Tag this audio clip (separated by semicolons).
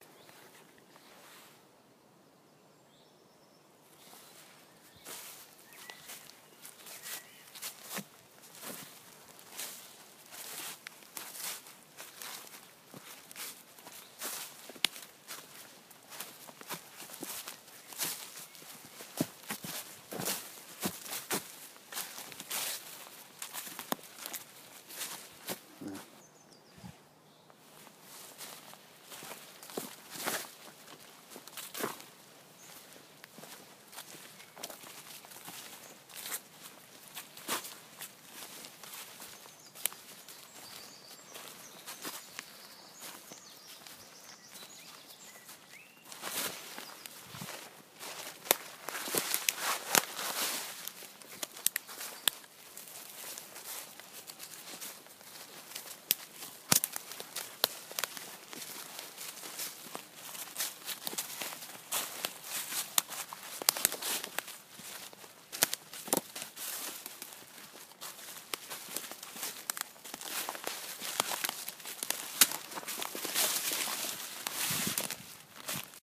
Bl; cke; forest; Fr; hling; leaves; St; sticks; tter; Wald; walk; walking; wood